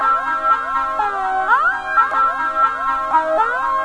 hf-7312 Tranceform!
It's an aggressively strange and undeniable peculiar loop. Thanks HardPCM for the tip, this is a great find.
chill, chillout, electro, electronica, interlude, loop, ts-404, world